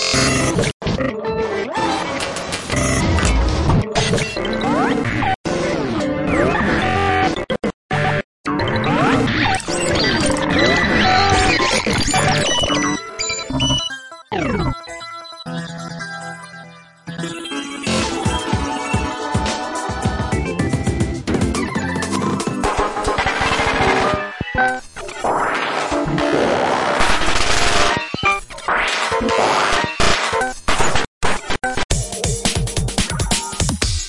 random sound collage to build samples up
glitch, idm, collage